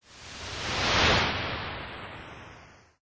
By request. A whoosh. 4 in a series of 7 - slow build, like an ocean waveI took a steady filtered noise waveform (about 15 seconds long), then added a chorus effect (Chorus size 2, Dry and Chorus output - max. Feedback 0%, Delay .1 ms, .1Hz modulation rate, 100% modulation depth).That created a sound, not unlike waves hitting the seashore.I selected a few parts of it and added some various percussive envelopes... punched up the bass and did some other minor tweaks on each.Soundforge 8.
soundeffect, effect, fm, synth, electronic, whoosh